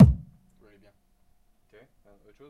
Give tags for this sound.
one-shot simple

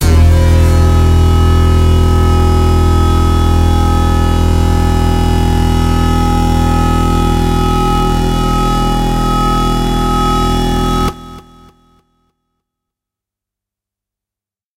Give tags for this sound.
synth waldorf

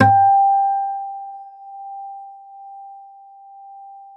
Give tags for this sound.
guitar acoustic multisample

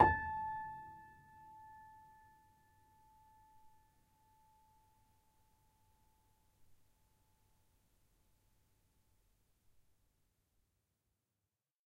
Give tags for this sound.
piano choiseul upright multisample

